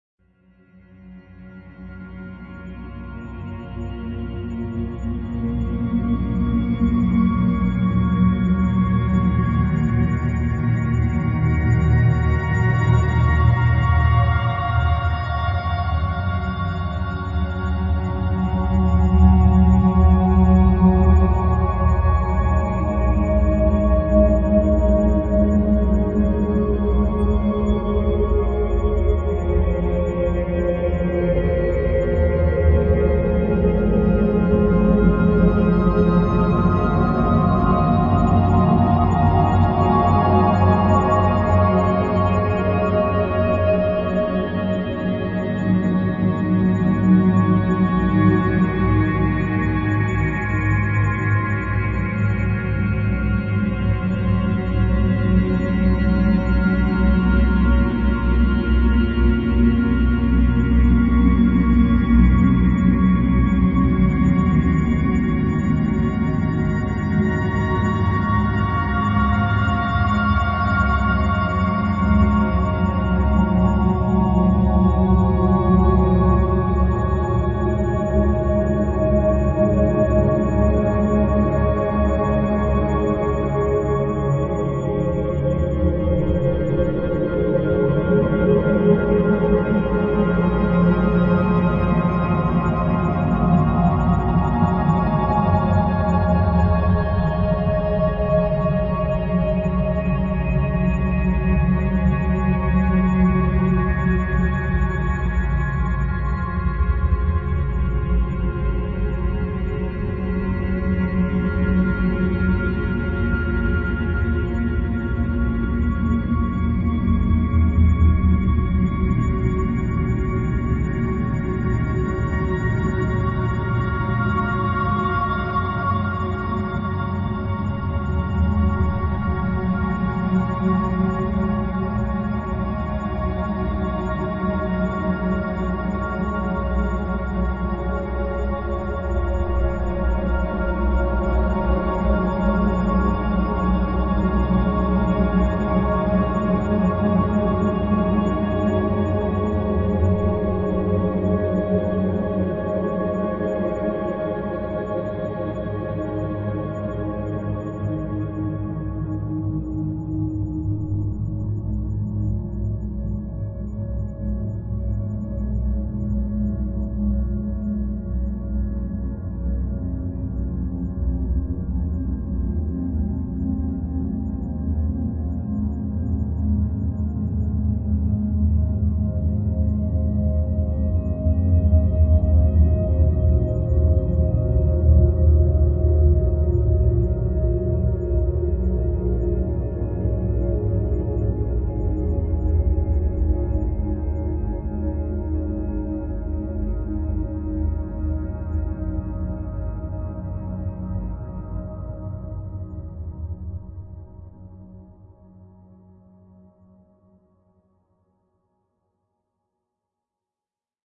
Ambient Wave - (Harmonics)
This sound or sounds was created through the help of VST's, time shifting, parametric EQ, cutting, sampling, layering and many other methods of sound manipulation.
Drone, Loop, Ambient, commercial, Cinematic, Pad, Looping, Drums, Sound-Design, synth, Atmosphere